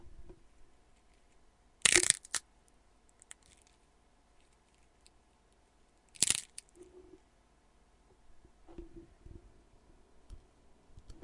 Bone Being cracked
Sound of bone being crushed (not actual bone, just hard taco shells.)